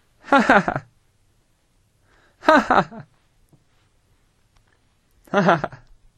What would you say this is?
A young man laughing "Ha ha ha" in a weak style that could sound fake, sarcastic or lame